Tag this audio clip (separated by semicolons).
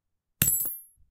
drop; falling; buy; move; coins; pay; finance; metal; payment; shop; coin; ding; money; dropping